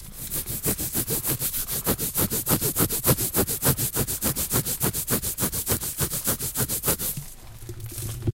Scratching pants
Someone scratching his pants.